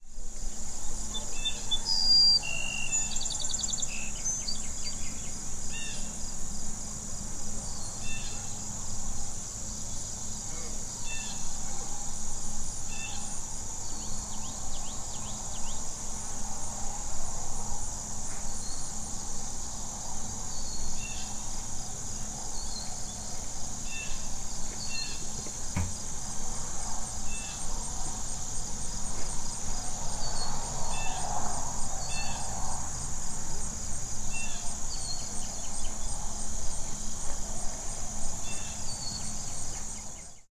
Ambience Farm 02
farm, field-recording, ambience